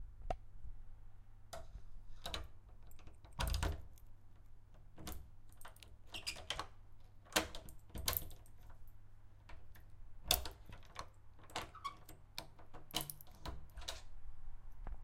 turning key in lock
Squeaky turning of key to unlock door